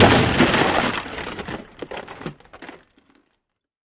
GIANT ICE CRUSH - REMIX OF 94655
I took two of the 'crushes', layered them and added a lot of Haas effect stereo expansion. Then I dropped the pitch of the result down by two octaves (one quarter of the speed of the original). Finally I made the initial attack louder to create a greater sense of impact. All processing was carried out in Cool Edit Pro.